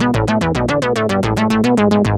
vintage synth 01-03-01 110 bpm
some loop with a vintage synth
synth, loop, vintage